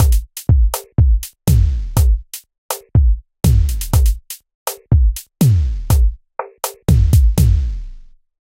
SlowZouk3 61 BPM
Slow zouk drum beat loop
drum, loop, slow, beat